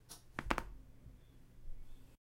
22 -Tronarse el cuello
sonido de alguien tronandose